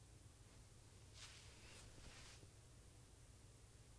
HAIR SWEEP
Me sweeping my hair to my shoulder. NTG2